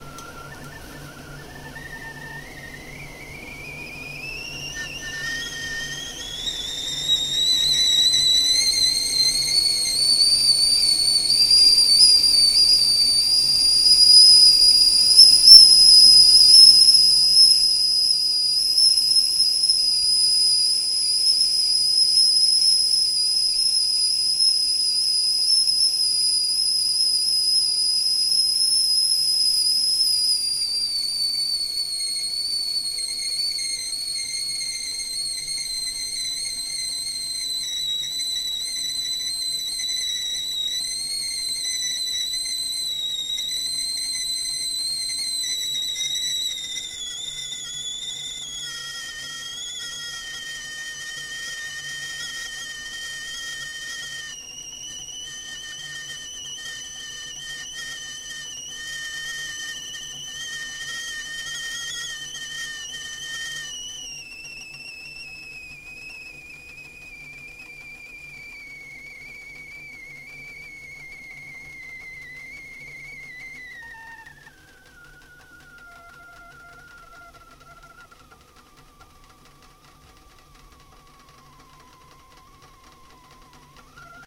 Tea kettle boiling various levels of whistle
Various levels of intensity of whistle
water, steam, fire, brewing, whistle, boiling, teapot, boiling-water, tea, hot, stove, kettle, tea-kettle, boil, whistling